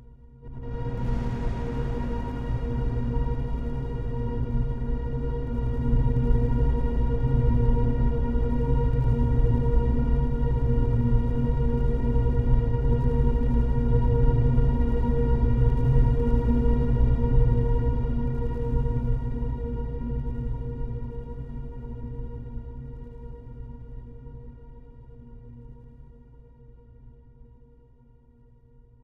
A tense string pattern over a deep menacing rumble. Part of my Strange and Sci-fi pack which aims to provide sounds for use as backgrounds to music, film, animation, or even games.
processed, synth, atmosphere, electro, ambience, dark, drum, rumble, sci-fi, tension, strings, space, percussion, city, music, boom